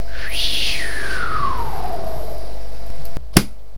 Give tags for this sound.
drops; falling; object; falls; which

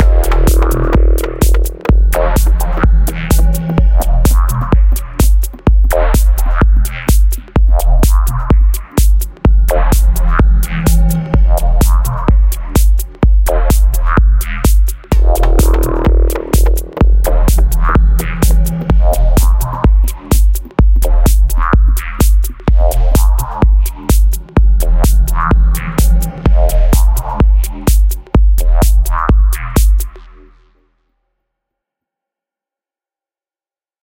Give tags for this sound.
Techno; Beat; Minimal; Kick; Dark; Bassline; House; Atmospheric; 127bpm; Bass

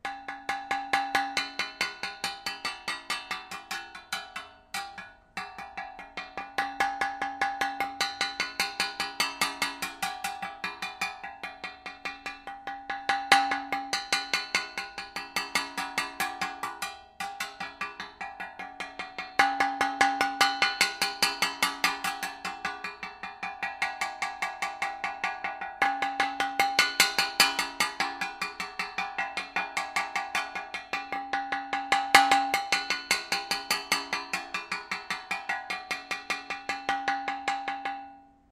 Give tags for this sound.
tin; pen; can; metal

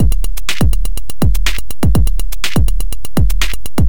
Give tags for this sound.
123bpm; beat; drum-loop; engineering; Monday; mxr; operator; rhythm; teenage

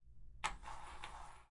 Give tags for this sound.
boing; falling

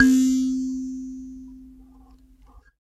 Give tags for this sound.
percussion; sanza; african

